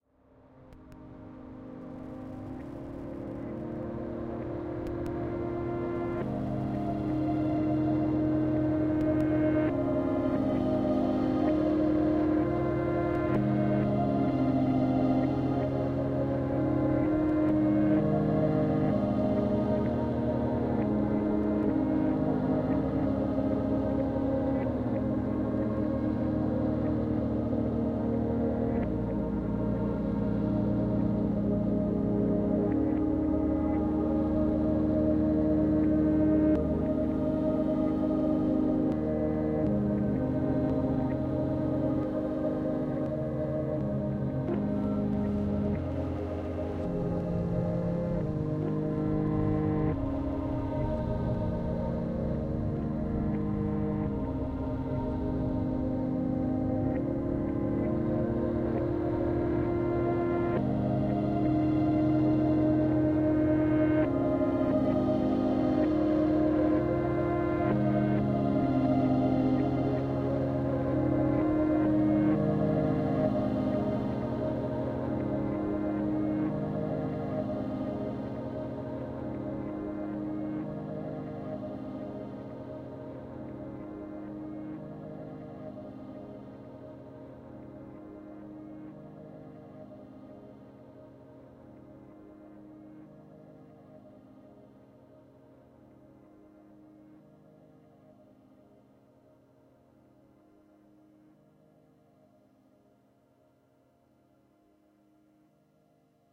A soft and relaxing sound made from Rhodes sounds, a lot of reverb, some granular synthesis and some pitch and speed adjustments. Perhaps a good intro to something.
ambient, granular, quiet, relaxing, sound-design